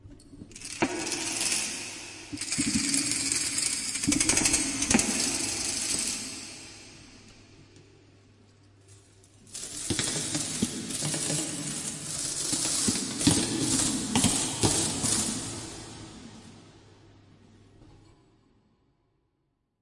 microphone + VST plugins